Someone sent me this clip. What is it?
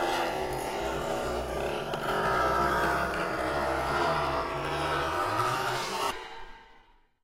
I manipulated the sounds of a zipper-like siuation
MTC500-M002-s13waking, unfoldingscary, zombiesuspensezipper